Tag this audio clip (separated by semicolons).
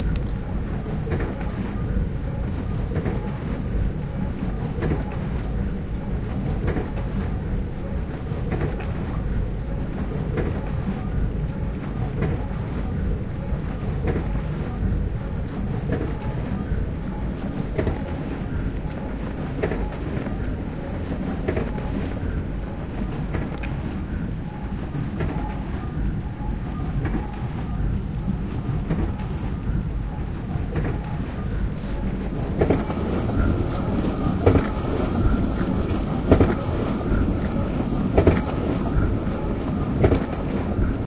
dishwasher; machine; washer; washing